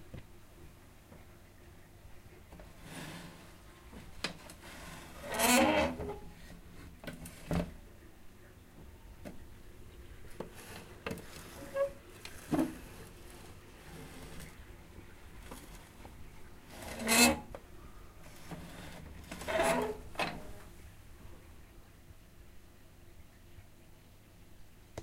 old window01
window, cracking, vintage, metal